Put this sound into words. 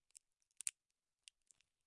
Breaking open a pecan using a metal nutcracker.